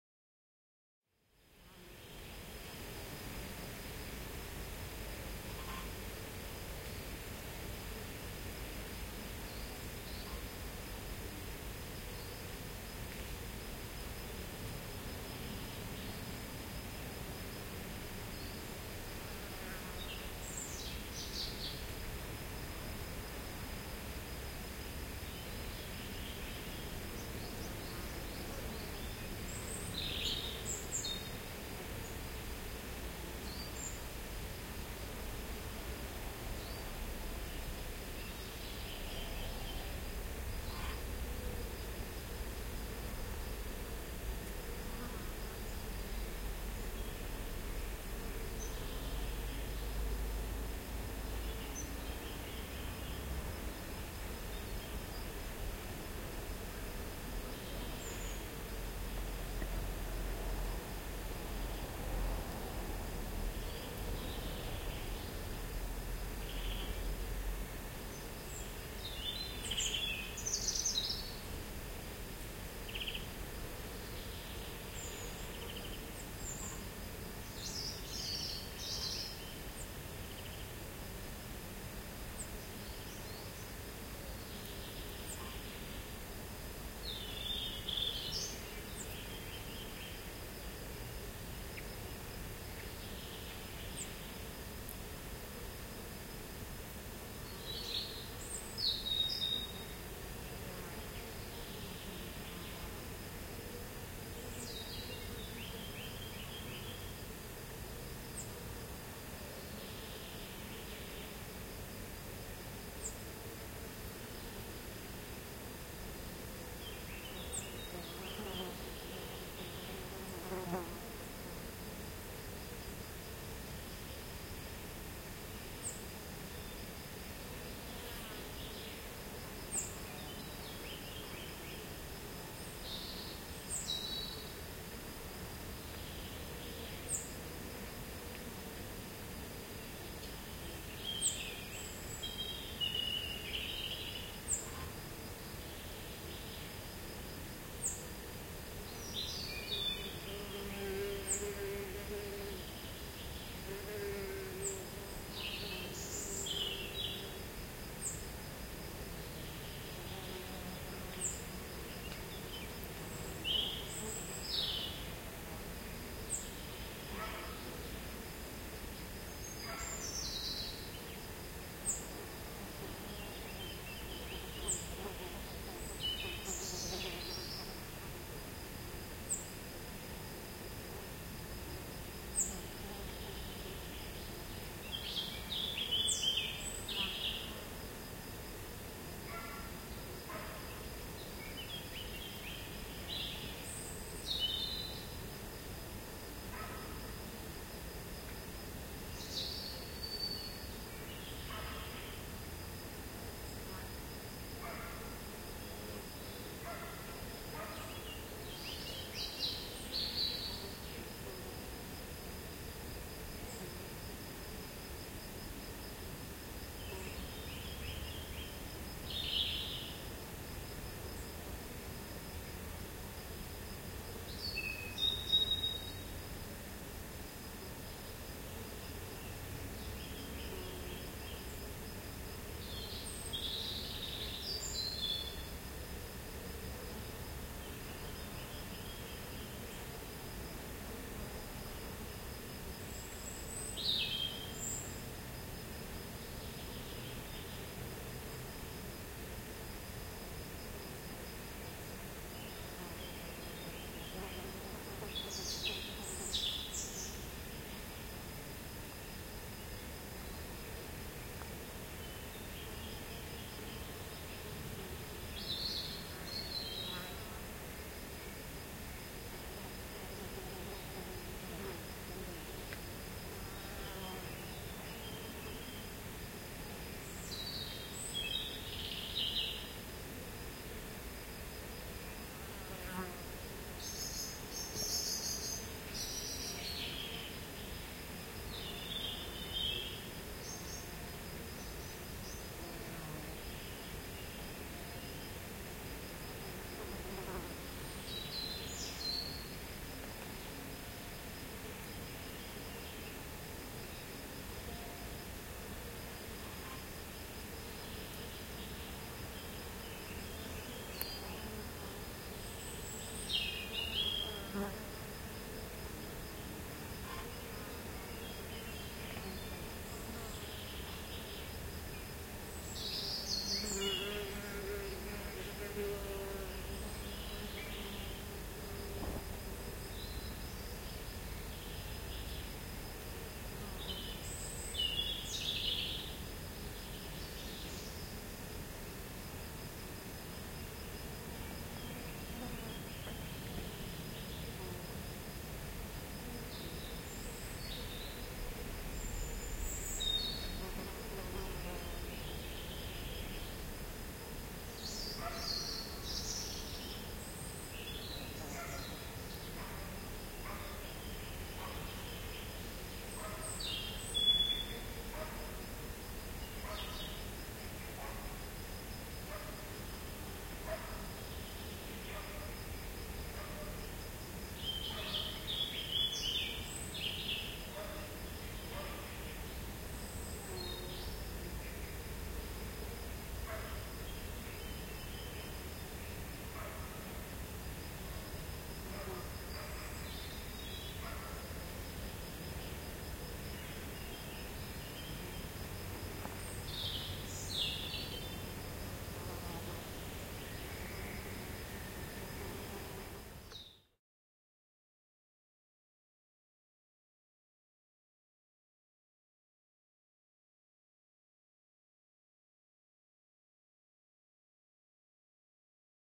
Urgueira SenhoradaGuia 48kHz24
Field recording in the Senhora da Guia's yard in Urgueira, a small village with 12 inhabitants, belonging to the municipality of Águeda near the Serra do Caramulo in Portugal.
nature, insects, birds, field-recording, wind